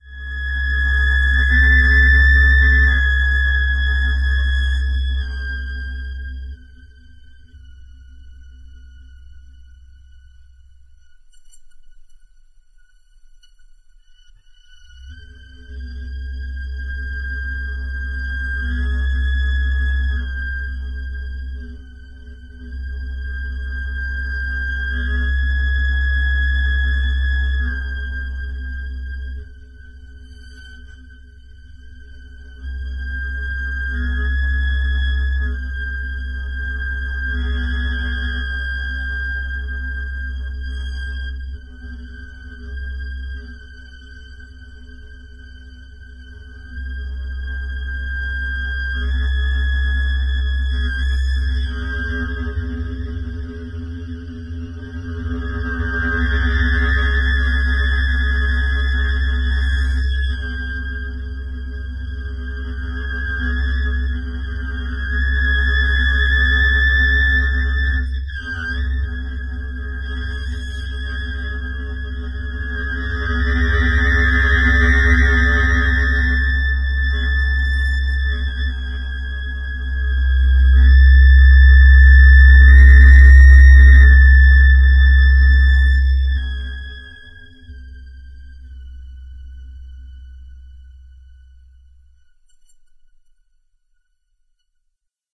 A long and low clarinet tone processed by Granulab. The character is changed dramatically resulting in a moving tone with dramatic and cinematic quality. You have to listen through it and use the parts that suit best a particular scene.
drone, double-tone, tone, granular, clarinet